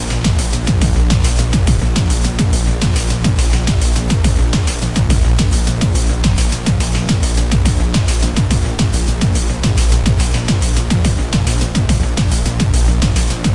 war zone battle music
battle, command, game, computer, dramatic